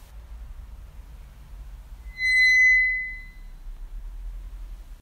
Gate Creak 3
The creaking of a gate at nighttime. Made using a gate. And fear.